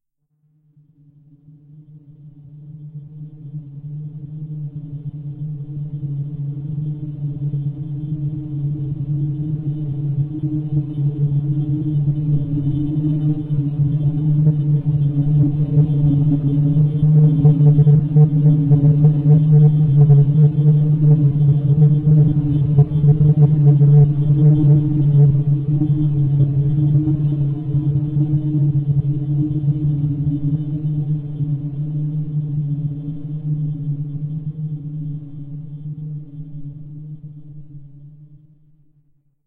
distorted Hum
A deep progressive low tone distorted and broken up with buzzy tones
build-up, buzzy, deep, Distorted, hum, low